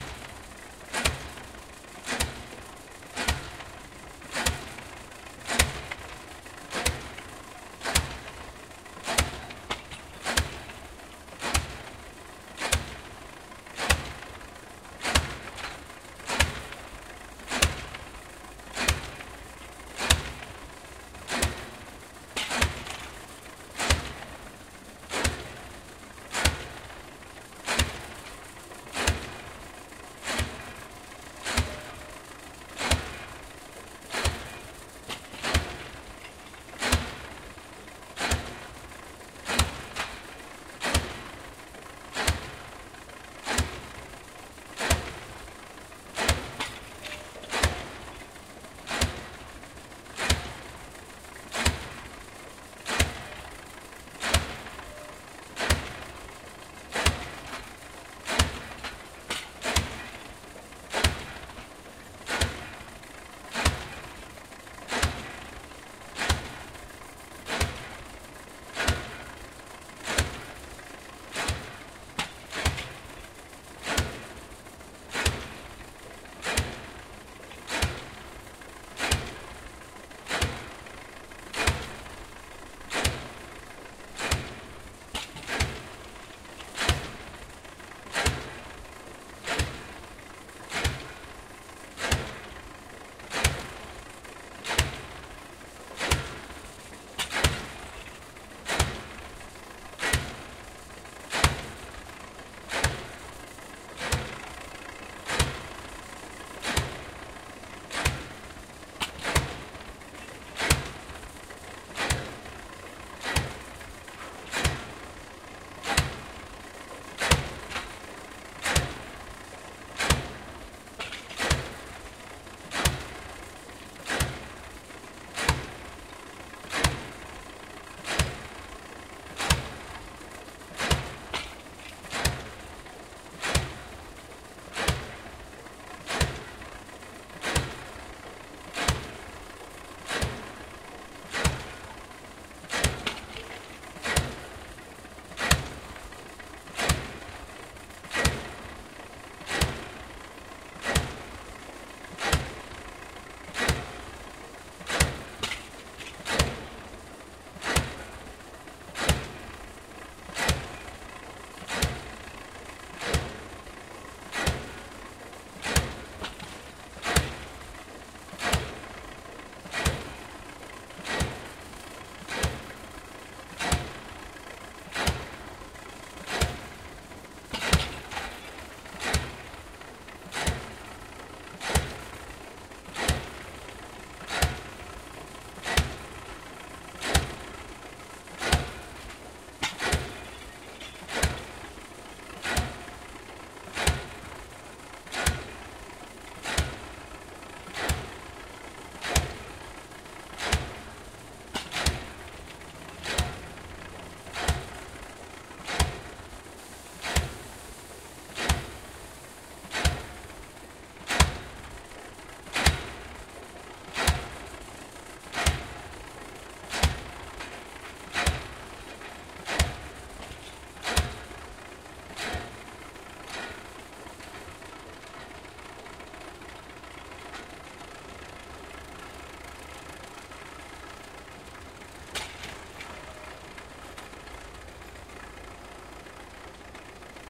construction nail pile 2
Constriction of the building. Sound of nail pile.
Recorded at 2012-11-01.
building-construction, city, construction, growl, nail-pile, noise, pile, rumble, tractor